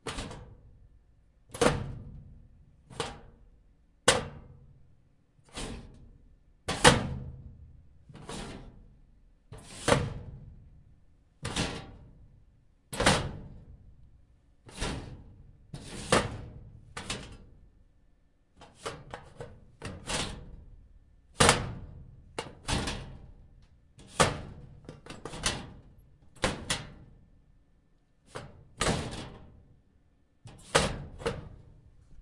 A metal drawer open and closing in various ways.
metal, drawer, bang, cabinet